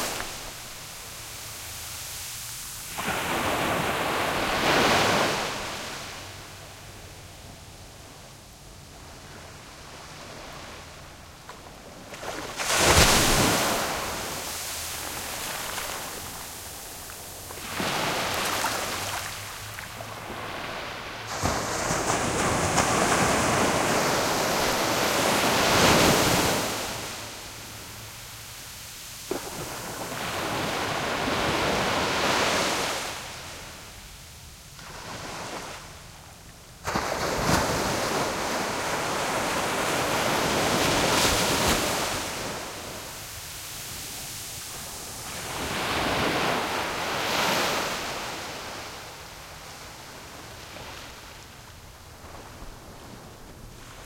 Thailand ocean waves crashing on beach cu frothy delicious
Thailand ocean waves crashing on beach close frothy delicious